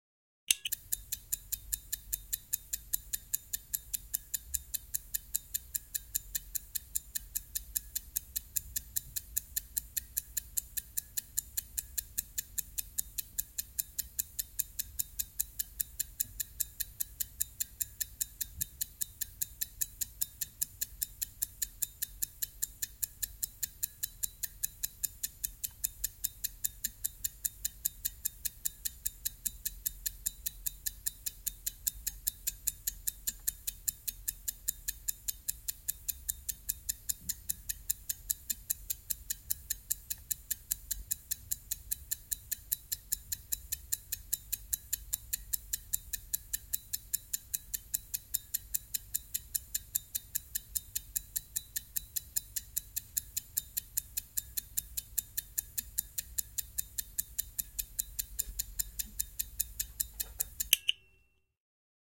Sekuntikello, sekundaattori, tikitys / Stopwatch, start, tick, stop, interior
Kello käyntiin, tikitystä, seis, stop. Sisä.
Paikka/Place: Suomi / Finland / Vihti
Aika/Date: 29.08.2000
Suomi, Yle, Finland, Clock, Tick, Sekundaattori, Yleisradio, Tehosteet, Stopwatch, Field-recording, Mechanical, Interior, Kello, Soundfx, Finnish-Broadcasting-Company, Ticking, Tikitys, Mekaaninen